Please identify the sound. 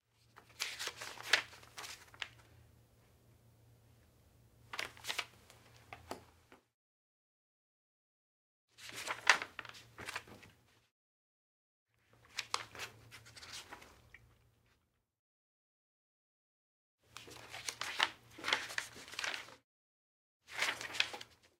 paper sheets flip through turn page nice various
flip, paper, page, turn, sheets, through